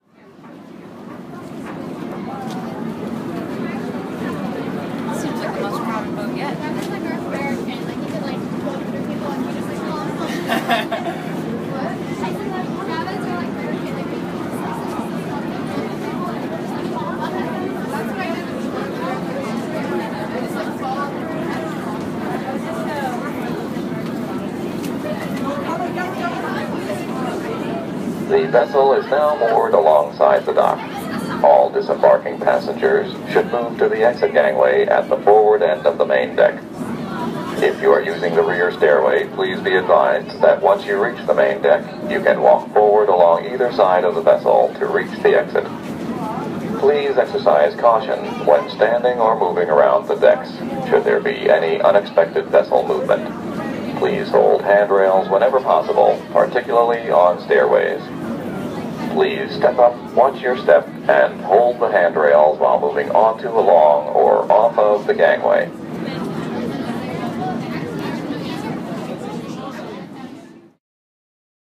Exiting the Statue of Liberty/Ellis Island Ferry
announcement, boat, conversation, disembark, ellis-island, english, exit, female, ferry, field-recording, male, people, speech, statue-of-liberty, talk, voice, voices